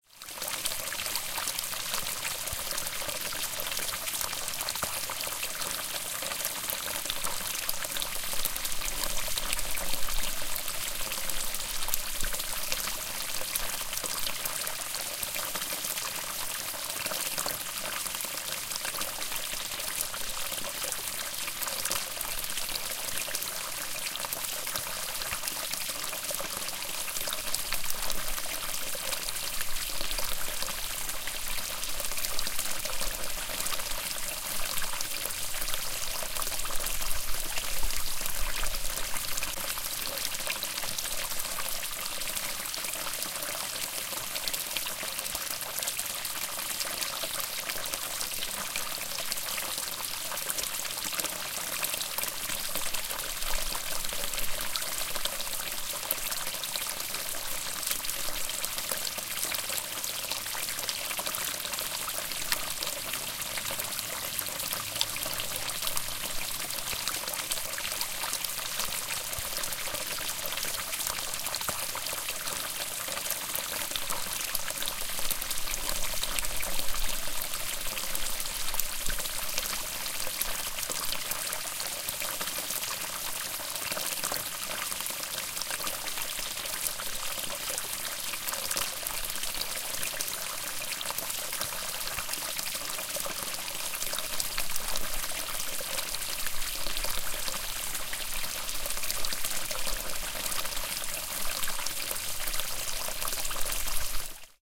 tinkling water
Recording of the water feature I built recently in my friend's garden.
cascade, field-recording, gurgle, splash, tinkle, trickle, water, waterfall, water-feature